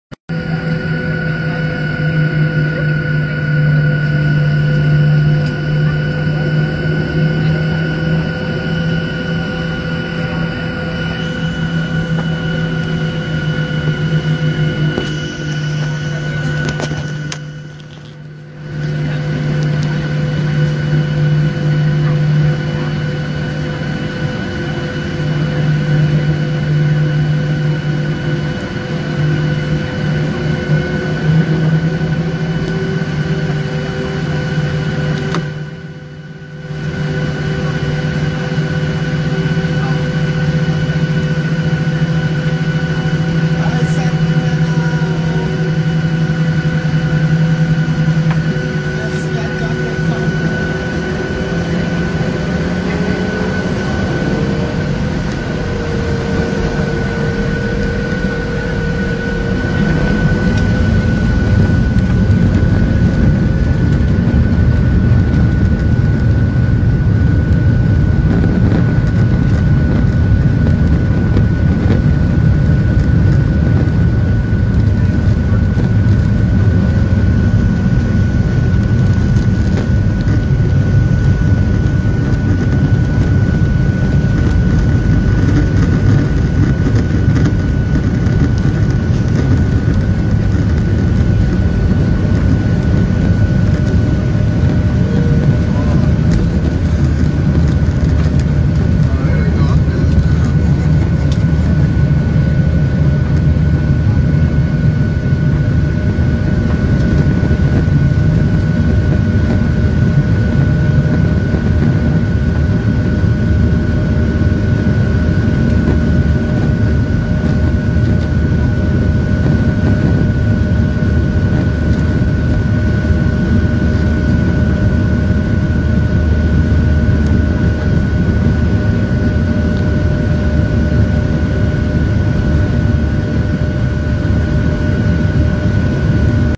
Recording from inside the cabin as we take off from Sacramento California